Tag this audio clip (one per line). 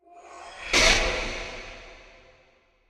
blasts
future
laser
space